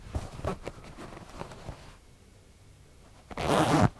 flys unzip 001
Flys on jeans being unzipped.